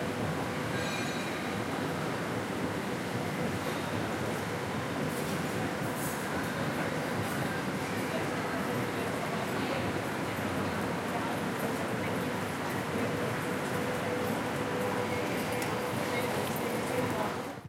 Shopping Mall, ambience
Shopping center ambience, passing a cafeteria, people talking.
people, shopping, mall, ambience, cafeteria